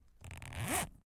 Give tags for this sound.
acting
backpack
canvas
foley
zipper

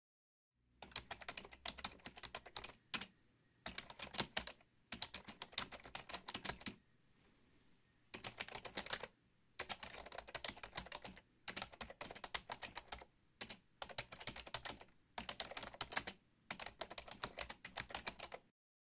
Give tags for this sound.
Tascam
Typing
Fast
DR-40
Keyboard

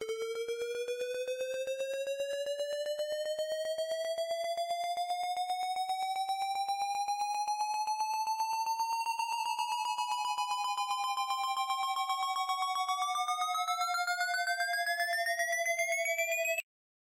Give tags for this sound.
Tremolo
Rise
Square

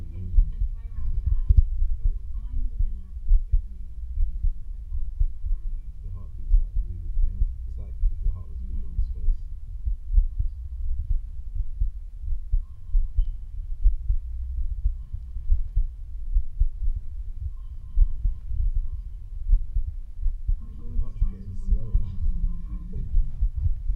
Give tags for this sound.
DIY
heartbeat
piezzo-mic

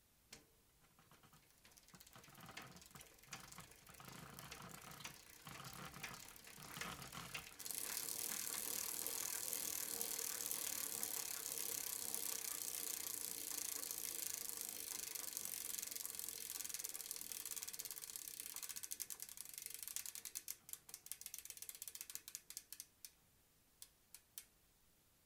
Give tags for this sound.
rider
bike
foley
bicycle
pedaling
ride
chain
wheel

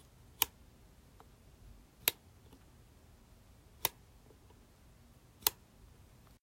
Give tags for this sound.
turn-on
lamp

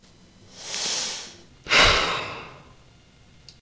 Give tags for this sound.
breath
sigh
speech
reaction
man
male
breathe
voice
vocal
human

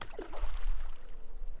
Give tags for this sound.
nature
splash

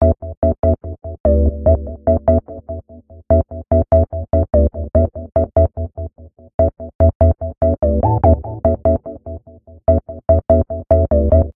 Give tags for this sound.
bass
dance
dub
electro
house
loop
organ
techno

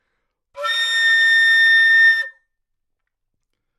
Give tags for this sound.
multisample
good-sounds
neumann-U87
flute
A5
single-note

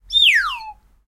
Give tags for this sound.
bamboo
cartoon
comic
descend
descending
funny
slide
tin-whistle
whistle

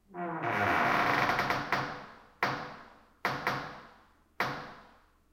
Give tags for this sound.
Creak
Door
Groan

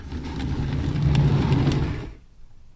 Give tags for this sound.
chair
roll
rumble
scrape

